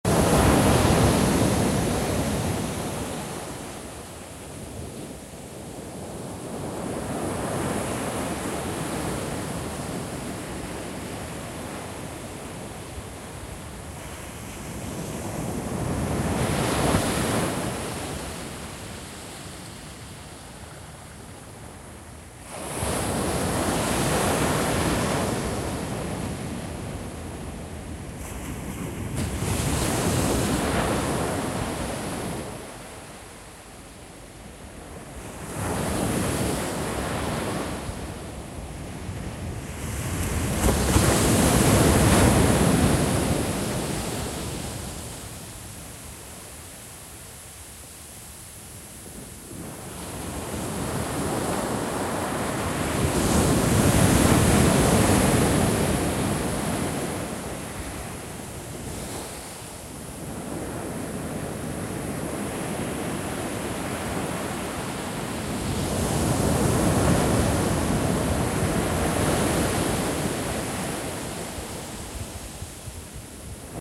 Sea shore at night-BRV
Waves at night at shoreline at Santa Clara beach, Panama - Olas en la noche en la costa de Playa Santa Clara, Panama
Beach, Olas, Playa